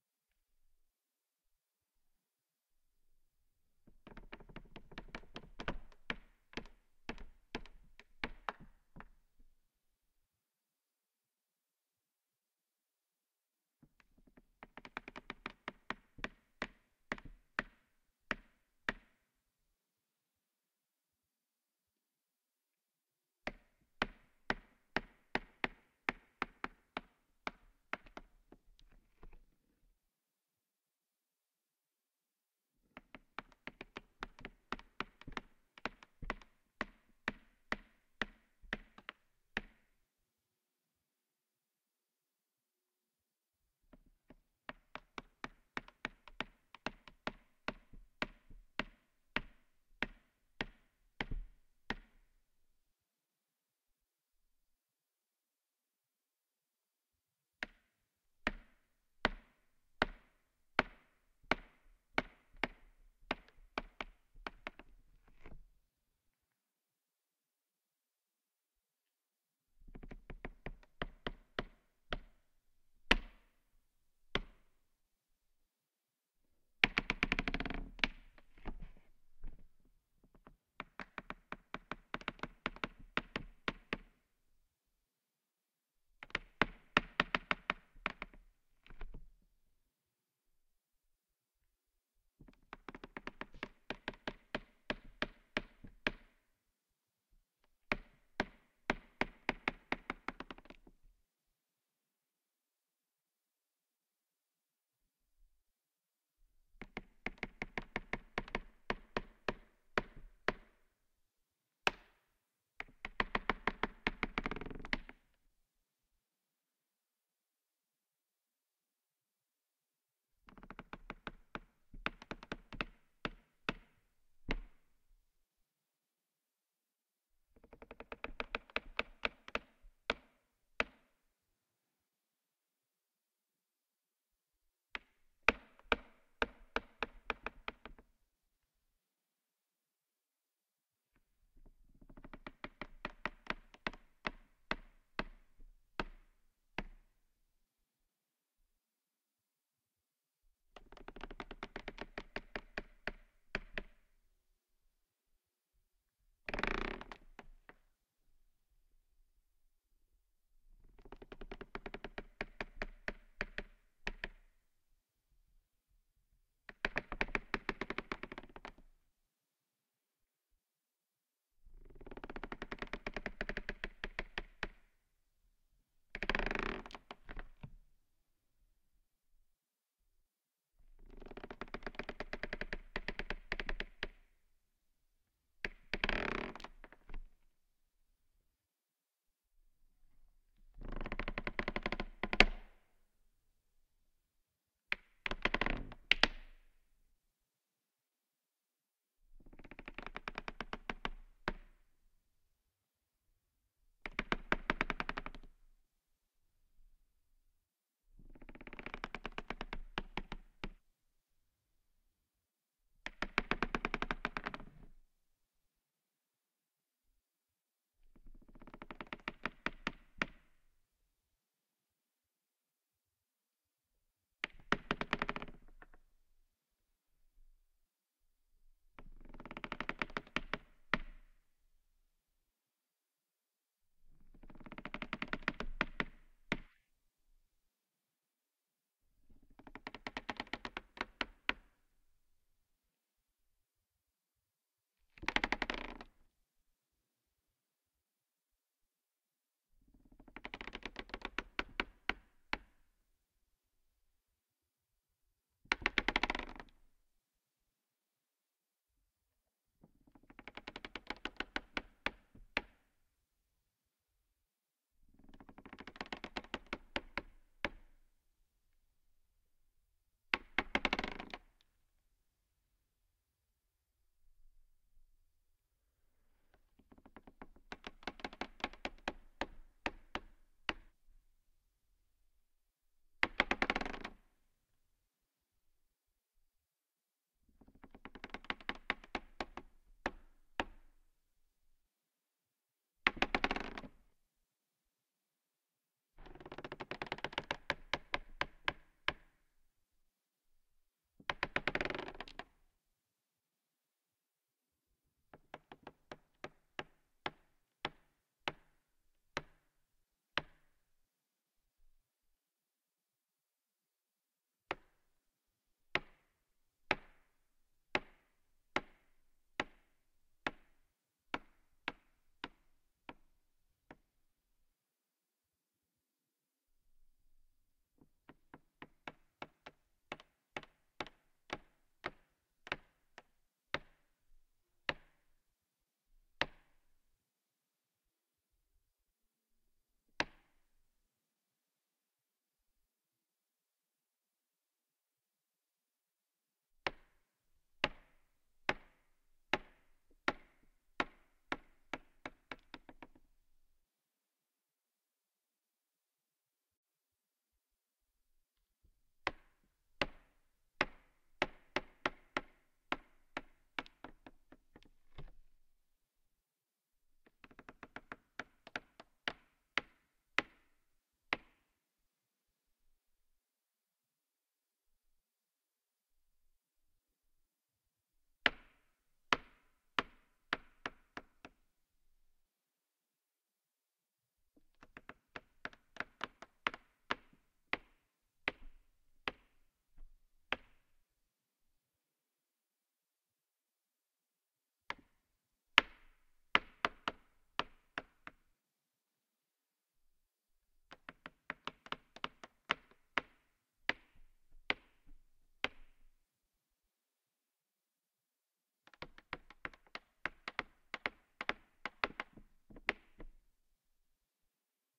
Plastic Creak 01 Quarter-Speed
Quarter-pitch version of Plastic_Creak_01. Same idea as playing back tape at quarter speed. No limiting or normalizing applied.
CAD E100S > Grace M101 > Mytek Stereo192 ADC > MUTEC MC-1.2 > RX4.